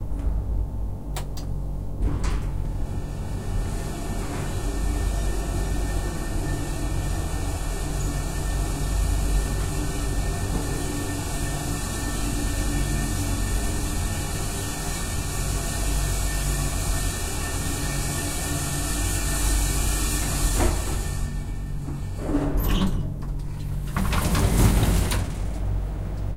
A very strange grinding of passenger elevator while driving.
Recorded: 2012-12-26.
AB-stereo